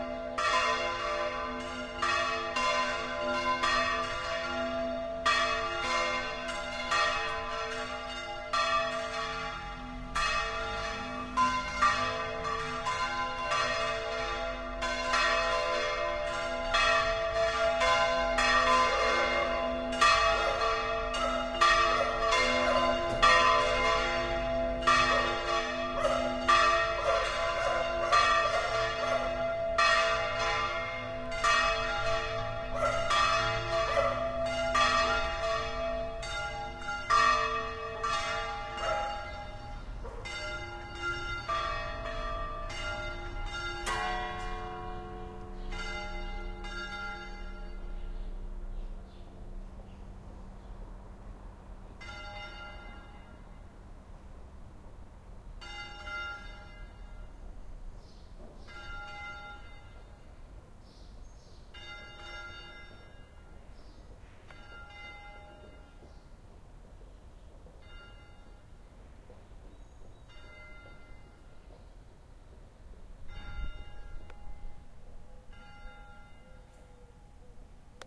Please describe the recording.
bells of Parish La Magdalena (Seville, Spain) pealing. Edirol R09 and internal mics
church, field-recording, ambiance, city, south-spain, bells, pealing